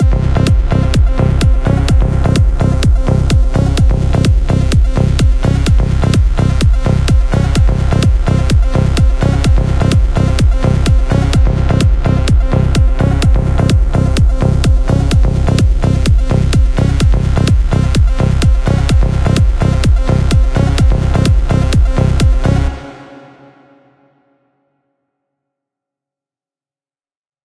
Hard style of looped sound for any arcade atmosphere; best fit in space invader, laser attack enjoy!
rhythmic, electro, arcade, beat, Laser, invader, space, game, loop, interesting, music, drum